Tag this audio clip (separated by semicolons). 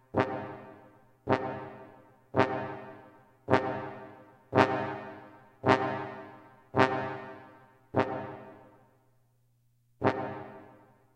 alarming; brass; brass-band; horn; trombone